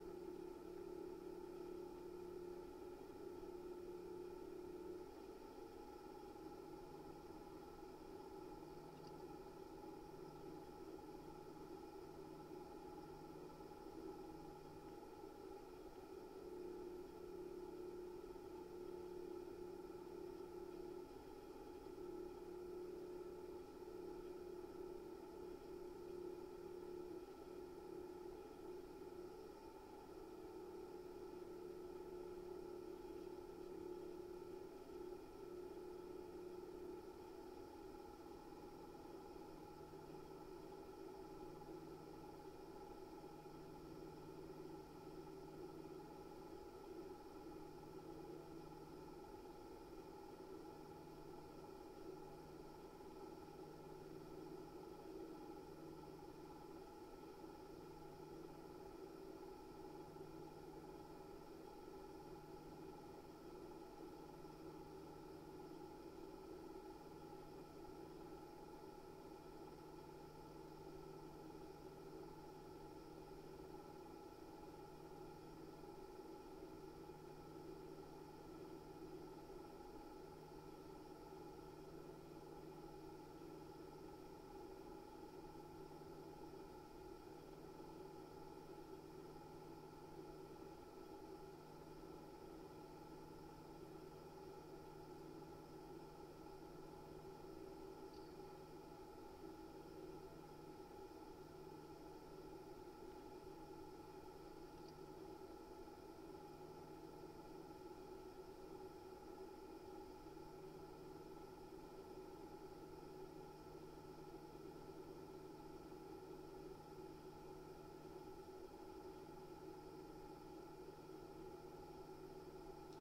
CPU Motor 1
Recorder with Korg contact just like previous reording, but from a different perspective and placing on the CPU.
cm300, korg